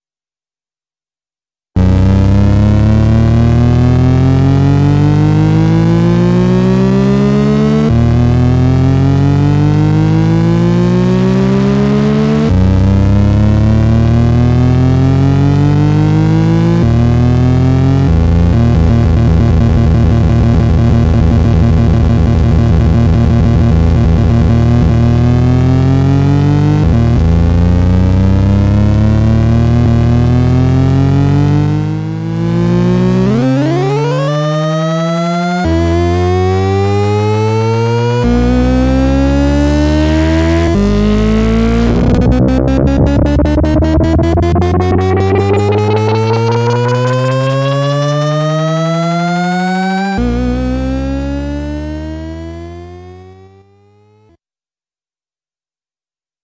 My play on Nord wave to get 8bit formula.